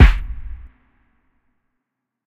The Aggressives Kick
The Aggressives drum kit. Crafted in Pro Tools using free web samples
Drum, Kick, Aggressives, The